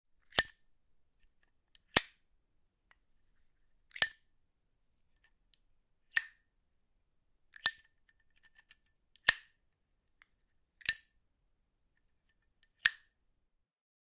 zippo open close
Opening and closing of zippo lighter